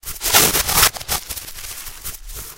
foil being crumbled
MTC500-M002-s13foil, awayrewind, crumblereverseswoosh